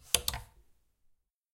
Coathanger Clothes Hanger
Hanging some clothes into the wardrobe.
cloth, clothes, coat, Coathanger, Hanger, wardrobe